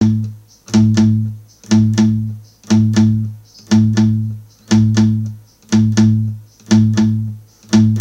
WINDOW1 Guitar2
A collection of samples/loops intended for personal and commercial music production. For use
All compositions where written and performed by
Chris S. Bacon on Home Sick Recordings. Take things, shake things, make things.
drum-beat, drums, whistle, looping, loops, harmony, vocal-loops, sounds, acapella, guitar, percussion, loop, melody, indie, bass, free, piano, beat, voice